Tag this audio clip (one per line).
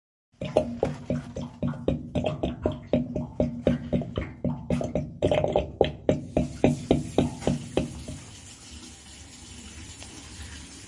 gurgle
shower
rhythmic
drain
flowing
liquid
sink
water
draining
trickle
flow